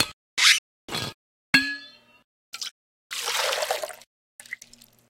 Tea-Can-Samples
samples from an IKEA aluminium tea can
canister, aluminium, metal, compilation, water, pour, tea-can, drip, liquid, tea, open-can, fluid, pouring